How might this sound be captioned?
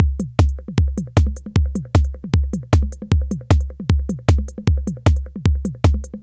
TR-606 (Modified) - Series 1 - Beat 03
Beats recorded from my modified Roland TR-606 analog drummachine
Drum, TR-606, Electronic, Beats, Circuit-Bend, Analog